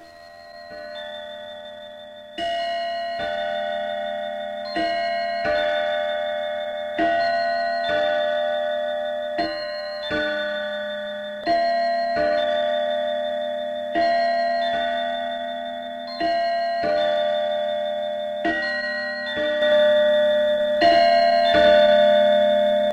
Well, well bell